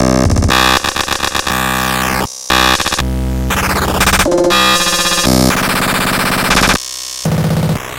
Bend a drumsample of mine!
This is one of my glitch sounds! please tell me what you'll use it for :D
android, art, bit, console, drum, error, experiment, Glitch, rgb, robot, robotic, space, system, virus